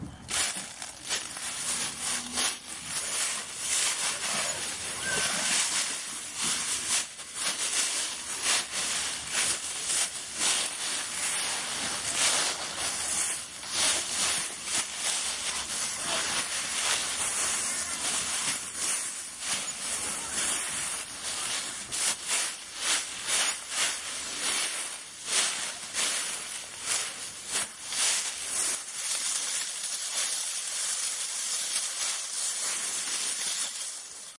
Recorded with the microphone of a Nikon Coolpix camera.